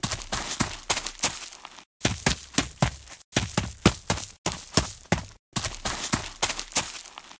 Running foley performance 3
Extended foley performance, abridged.
run, dirt, foley, grass, dead-season, shoe, fast